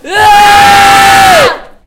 Crowd screaming
666moviescreams, crowd, scream, shout, upf, yell